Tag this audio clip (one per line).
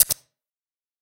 Scissors-Jump
Video-Game